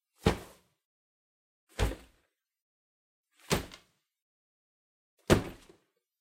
Bag Of Flour Dropped On Counter Top
A bag of flour is taken from a shelf and dropped onto a counter top.
drop, thud, bag, sack, dropped, flour, dropping, smack